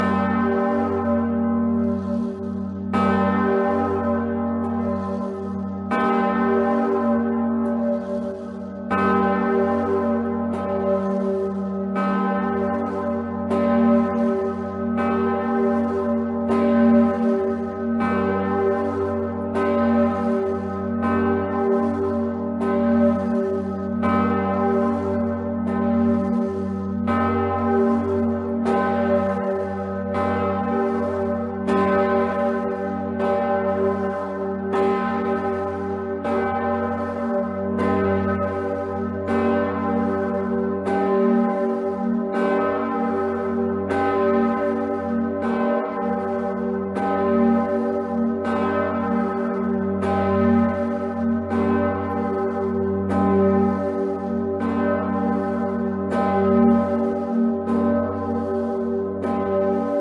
this is a Kölner dom bell : Pretiosa (10 tons).videotaped and edited to make it audio(record it the video myself with a blackberry phone!)